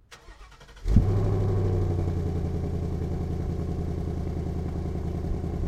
Recorded behind the car,
recording device: Zoom H2.